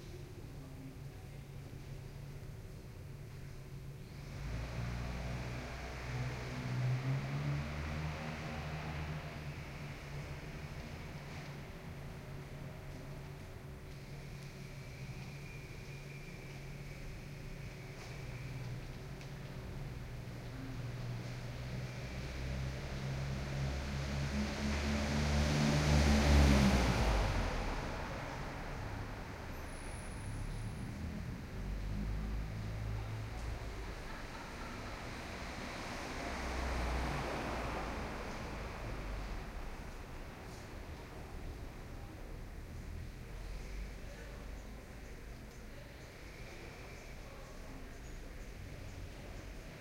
This is a binaural recording made with Zoom H4 and a binaural set of Core Sound microphones.
Recorded in Paris, France, in the 9th district on a very quiet afternoon.